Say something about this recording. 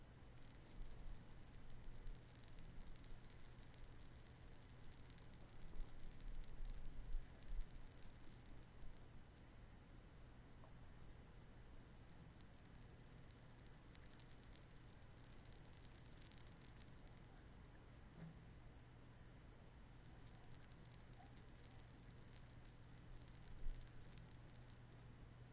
My aunt has some bells that sound light, delicate, and ethereal. She said they are from India. There are two strands of brass bells about the size of an acorn each. You are hearing both strands sounding in this recording.
brass, ethereal, Indian